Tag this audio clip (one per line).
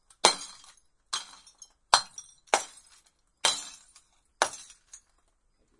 high-pithed
multiple